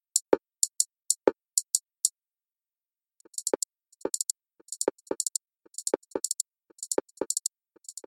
BRUYAS Charlotte-BeatHipHop
I generated a rhythmic sound that starts with a slow tempo.
I then added a silence that introduces a wahwah effect with a very low frequency and an increase in speed.
beat, drum, loop, rythm